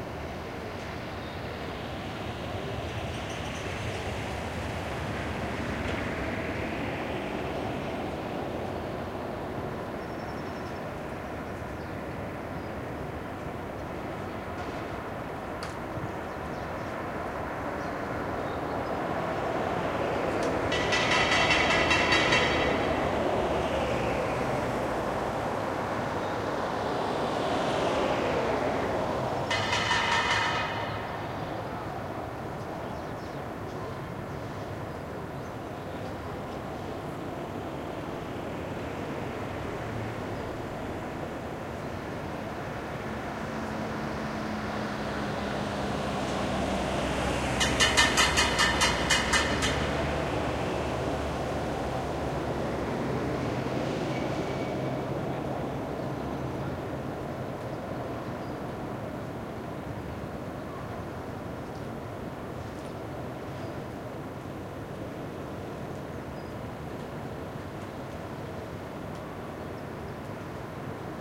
streetlife butane salesman 1
Street salesman of butane gas cylinders (Barcelona). Recorded with MD Sony MZ-R30 & ECM-929LT microphone.
street, salesman